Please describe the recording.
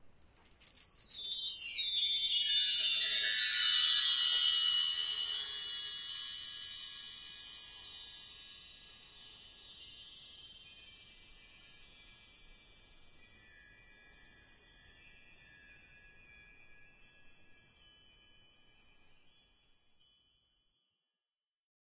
Bar chimes 01
orchestral bar chimes sample, made with a Sony Minidisc
chimes, cinematic, classic, orchestra, orchestral